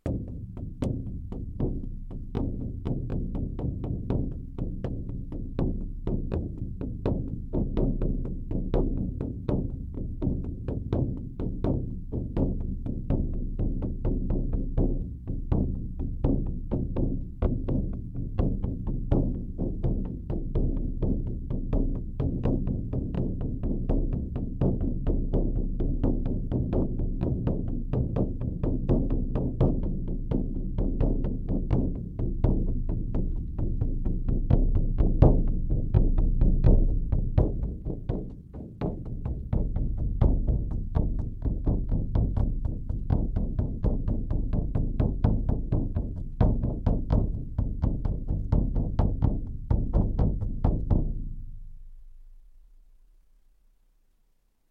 Shaman Hand Frame Drumming 05
Shaman Hand Frame Drum
Studio Recording
Rode NT1000
AKG C1000s
Clock Audio C 009E-RF Boundary Microphone
Reaper DAW
percs, drums, shamanic, shaman, percussion, drum, hand, bodhran, sticks, frame, percussive